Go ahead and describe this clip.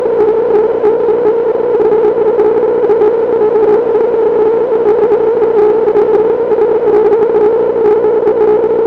radio circuit bending 1
Noisy sounds recorded from a circuit-bent radio transistor
ciruit-bending, radio